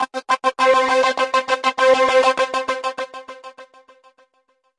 THE REAL VIRUS 02 - SINETOPIA LFODELAYS 100 BPM - C5
This is what happens if you put two sine waves through some severe filtering with some overdrive and several synchronized LFO's at 100 BPM for 1 measure plus a second measure to allow the delays to fade away. All done on my Virus TI. Sequencing done within Cubase 5, audio editing within Wavelab 6.
100bpm, sequence, multisample, rhytmic, loop